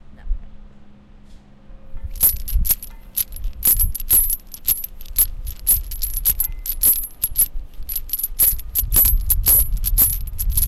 Sound for a spaceship or Prison.